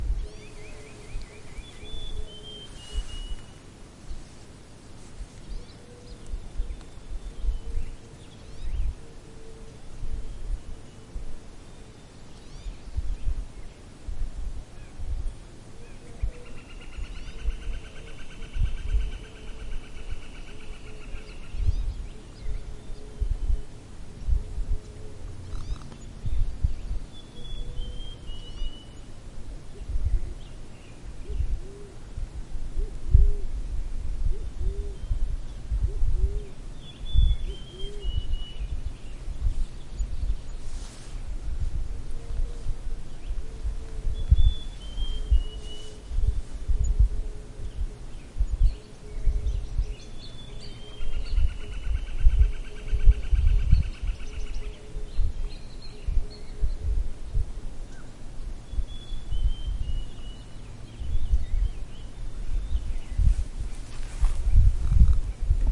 big bear lake 6AM ambience 2
The sound of 6AM in Big Bear, CA.
birds, field-recording, nature